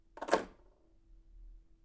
A sound of putting a hand watch on wooden table.